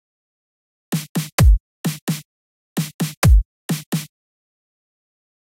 Snare Beat
It's a small beat sound made in FL Studio using: Snare and Kick